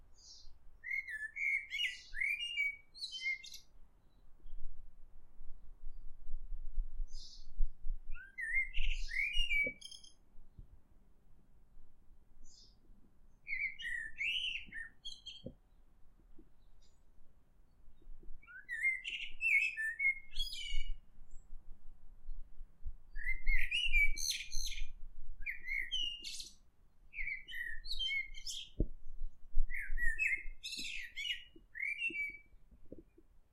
Field-recording of a blackbird in my garden in the morning. Recorded with a Zoom H5 and post-processed with Audacity.